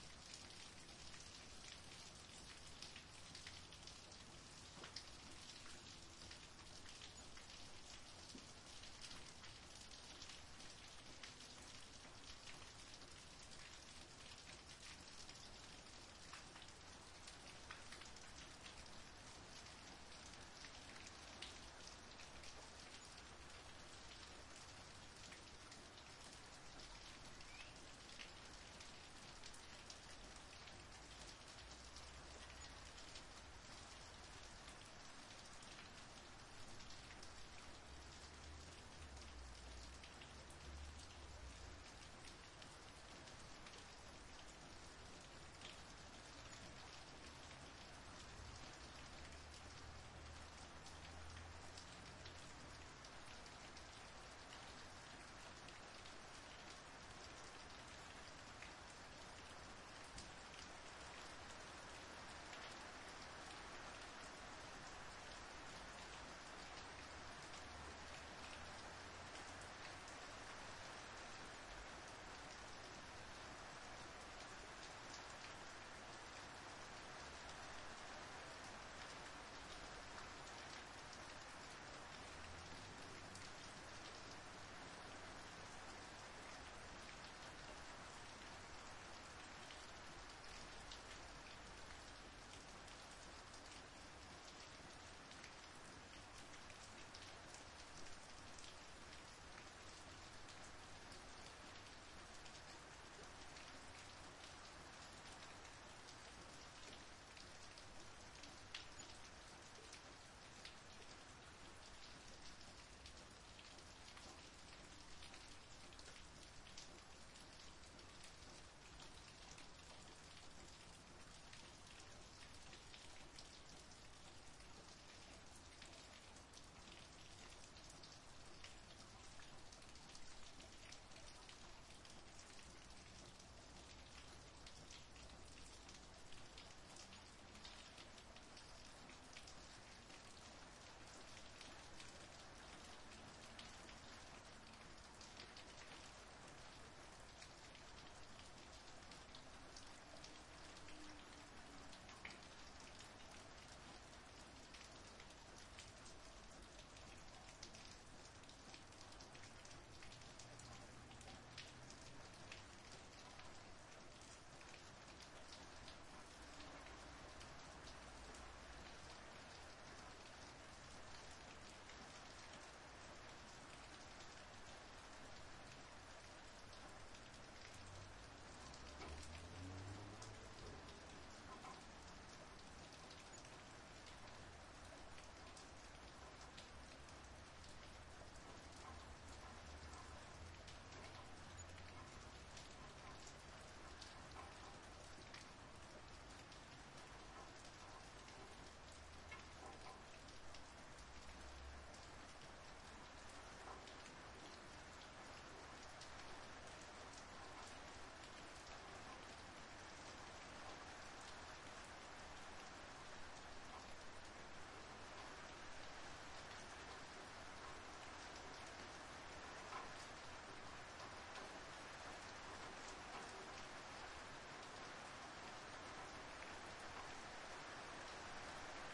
Rain facing drain pipe ortf
This is a recording I made during a lull in a storm, I recorded in ORTF configuration with a pair of AKG C1000's through a MixPre-6. I pointed the mics at my neighbour's house which had an overflowing gutter/drainpipe making the water slap on to the concrete.
Recorded 2017 in Melbourne Australia
drain-pipe, dripping, rain, raindrops, storm, water, wet